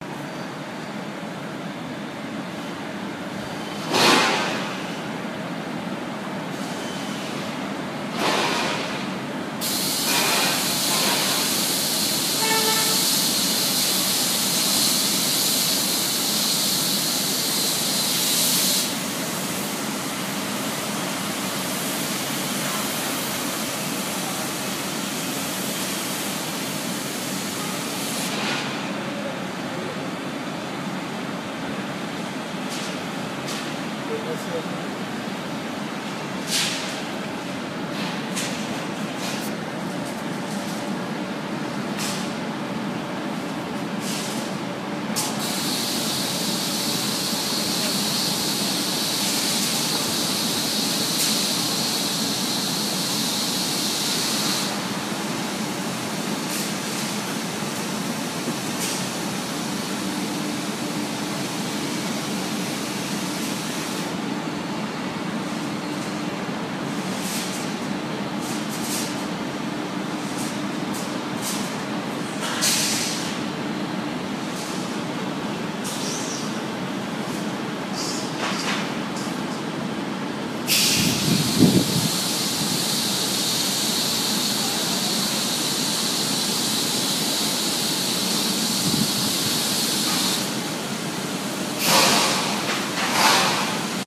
it was recorded as exterior voice in a industrial complex.
industry, work, machinery, worker, machines, industrial